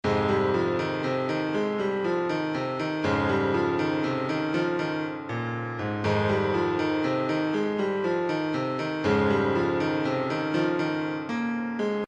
A loop I made and decided to post it. This is just the loop version. This loop was hand made (no library loops) on a program called Mixcraft.
awesomeness, cinematic, cool, loop